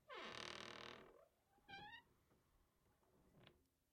Creaking Wooden Door - 0001
The sound of a wooden door creaking as it is opened.
Wooden, unprocessed, Squeak, Creak, Door, Household